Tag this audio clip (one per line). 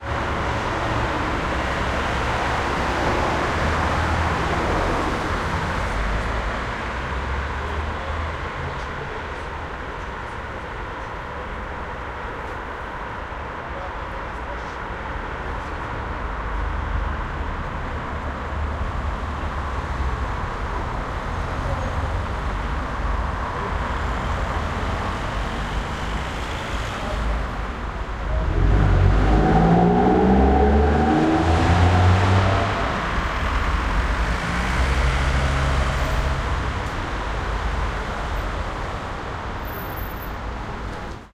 traffic
ambient
street